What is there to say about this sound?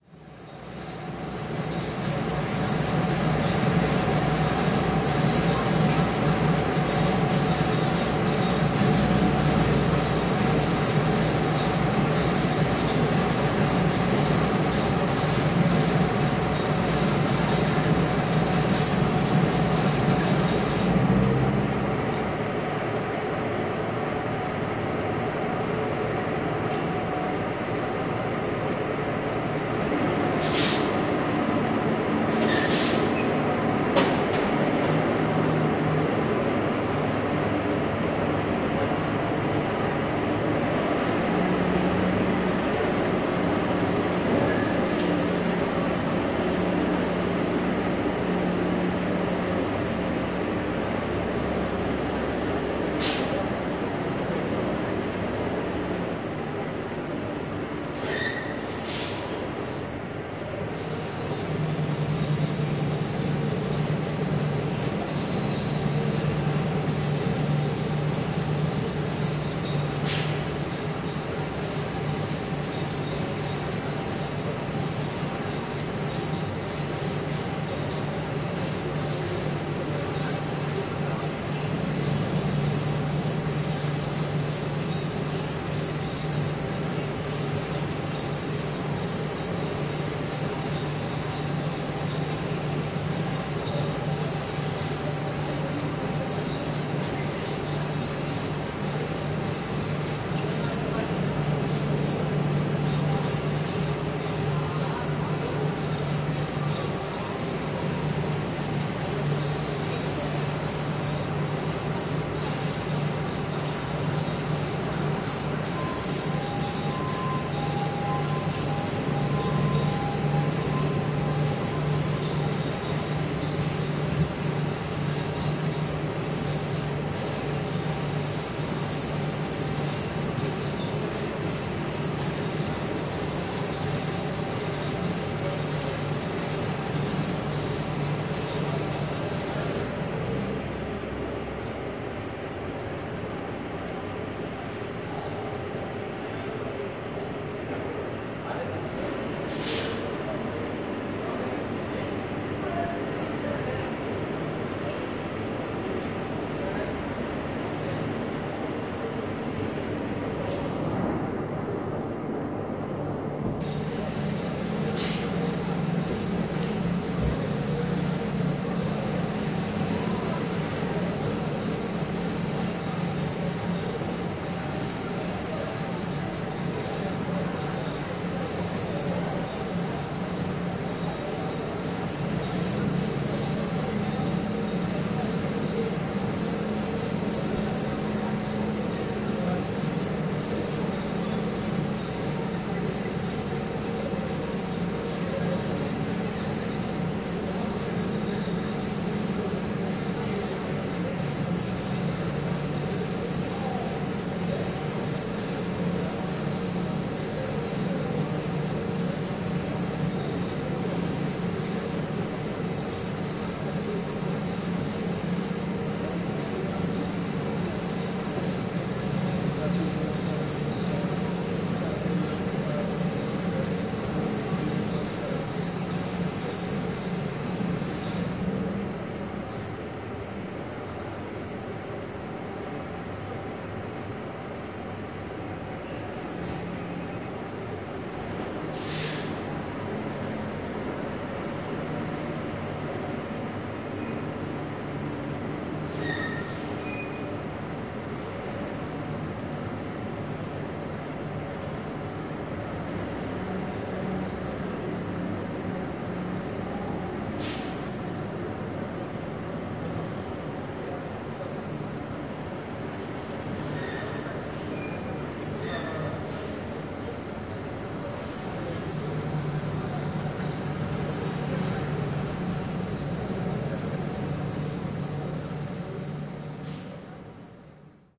30.04.2018 podlewanie drzew wrocławska
30.04.208: around 12.00 a.m. Field recording made through the window on Wrocławska street in the center of Poznań (Poland). Sound of watering plant/trees that grow in huge pots. No processing, only fade in/out (zoom h4n + shhure vp88).
city, street, water-cart, field-recording, Poland, watering, Poznan, noise, fieldrecording